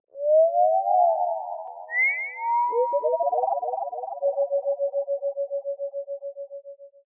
Space sound like from 1980s American cartoons. Use Audacity: chirps, tones with tremelo and sliding time scale/pitch shift
galaxy, space, cartoon